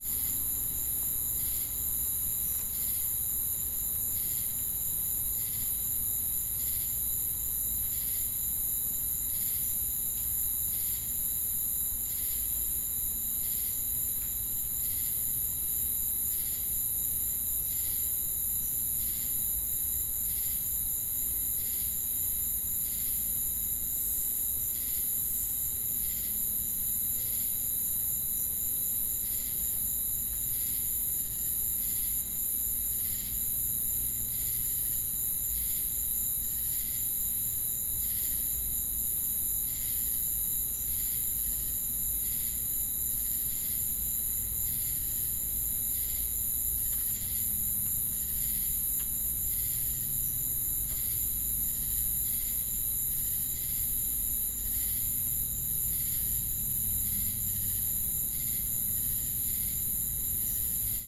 Ambience, Night Wildlife, A
A minute of raw audio of night wildlife in Callahan, Florida. Crickets more or less dominate the ambience.
An example of how you might credit is by putting this in the description/credits:
The sound was recorded using a "H1 Zoom recorder" on 20th August 2016.